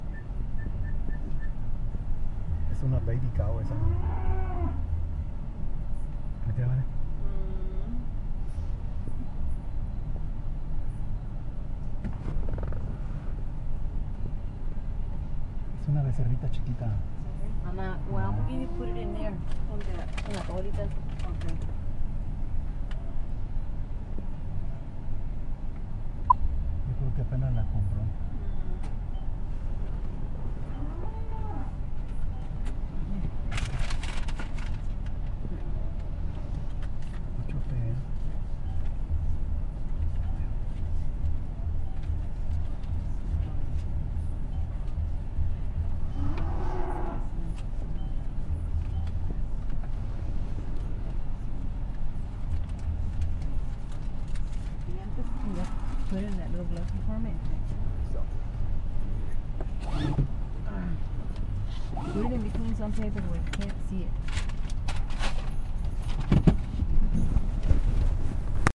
Auto Teller machine with baby cows
Field Recording. This is a recording of an Atm Machine. You can hear some cows in the background.